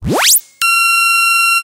Laser sound. Made on an Alesis Micron.